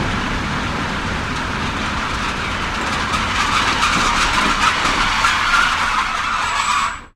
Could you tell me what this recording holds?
train breaking outside
Train coming to a standstill with lots of screeching and squealing. Sennheiser MKH-415T into Sound Devices 722
brake, braking, break, field-recording, screech, train